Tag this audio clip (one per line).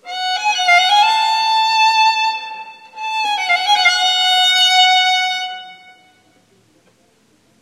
Baroque
Improvising
Ornamentation
Phrasing
Scales
Trills
Violin